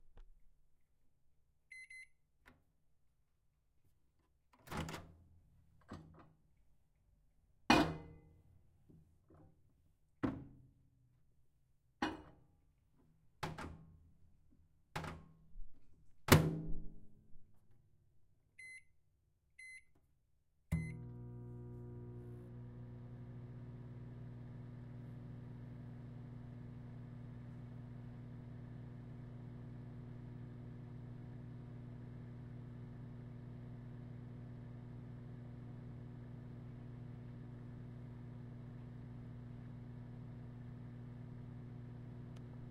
Appliance - microwave being used
A microwave being used.
motor, appliance, microwave, household, kitchen, whir